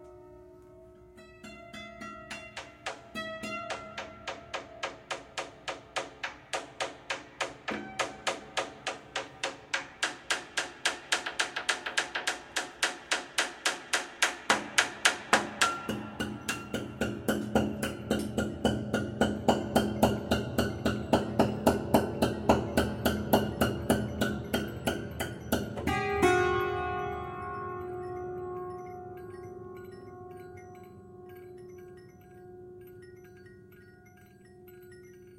HI KNOCKS 2 track recording of an old bare piano soundboard manipulated in various ways. Recordings made with 2 mxl 990 mics, one close to the strings and another about 8 feet back. These are stereo recordings but one channel is the near mic and the other is the far mic so some phase and panning adjustment may be necessary to get the best results. An RME Fireface was fed from the direct outs of a DNR recording console.
horror, effect, fx, piano, industrial, sound-effect, soundboard, sound, acoustic